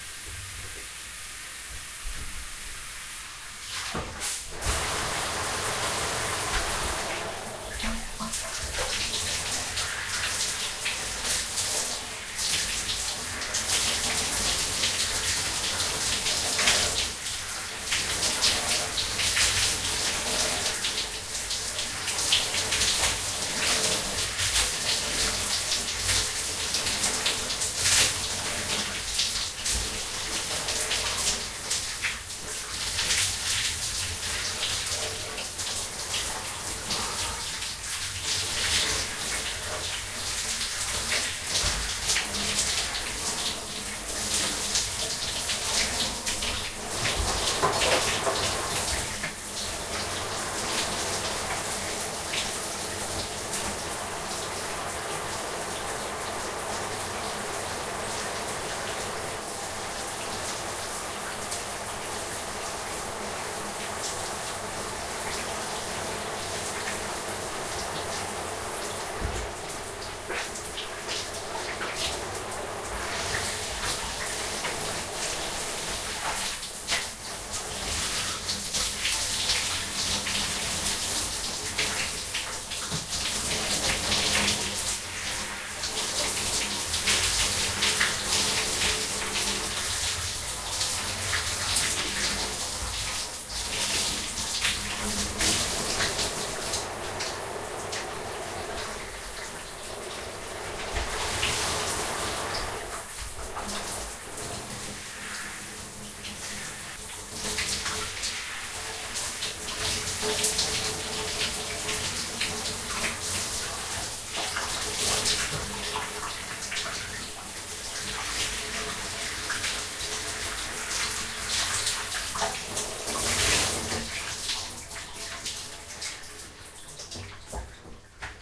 Someone having a shower.
daily-life, Shower, Water